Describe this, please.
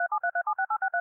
A telephone dial tone generated in Audacity with it's DTMF tone generator.
373376863, telephone, dial, dtmf, tone, audacity